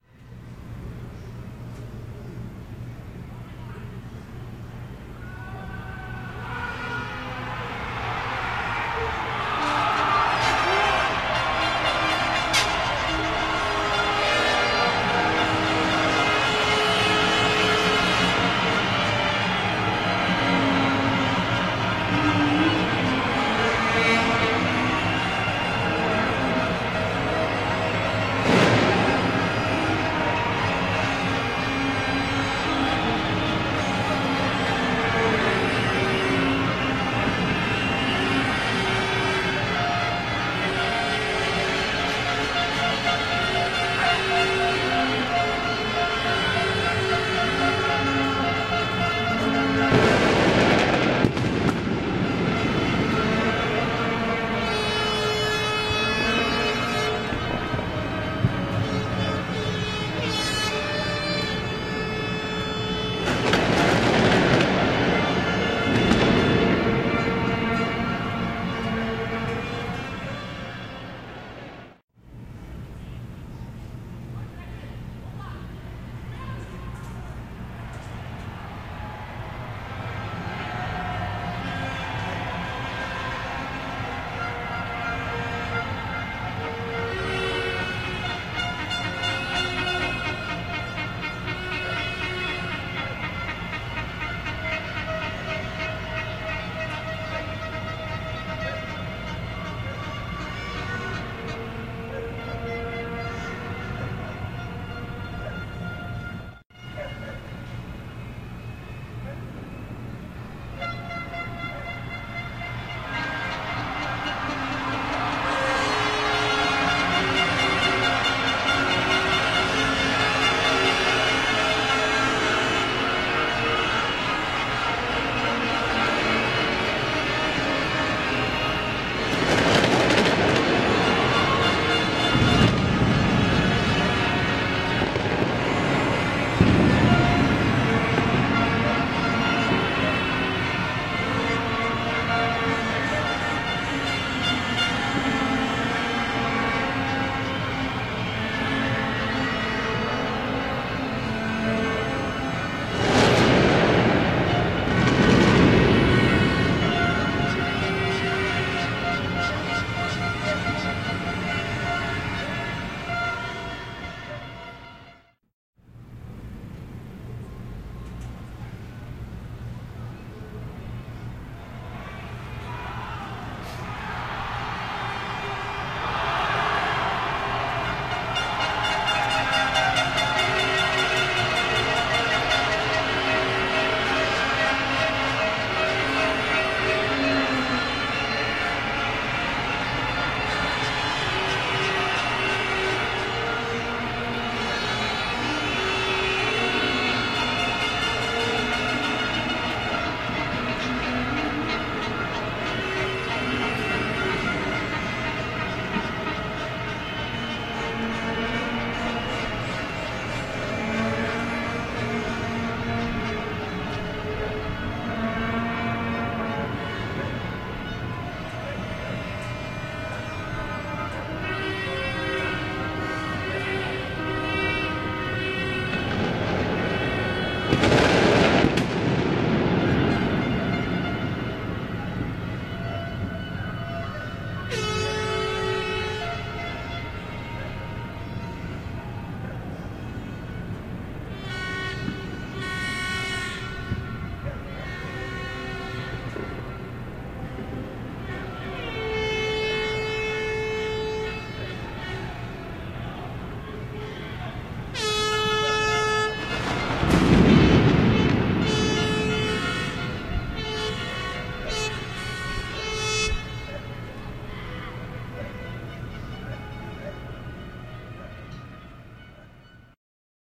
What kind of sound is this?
3 goals (Brazil-Croatia)
football noise freaks in brazil.
device: Canon G1x with tripod at home